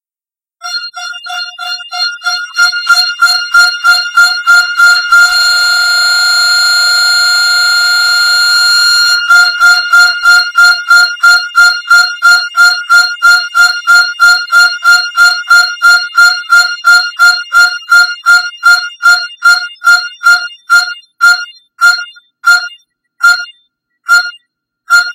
Bombole azoto
recording of the whistle of a new package of nitrogen cylinders for industrial use
acid, cylinders, industrial, nitrogen, whistle, work, wow